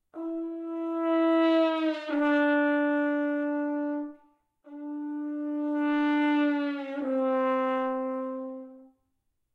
horn pitchbend E4 D4 D4 C4
Pitch bends produced by covering the bell slowly with the hand. The first sound is a bend from E4 to D4; the second is D4 to C4. Recorded with a Zoom h4n placed about a metre behind the bell.